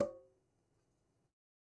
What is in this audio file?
Metal Timbale closed 006
closed, conga, god, home, real, record, trash